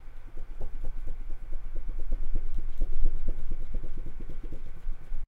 18 -Sonido de batir algo
algo batiendose en el aire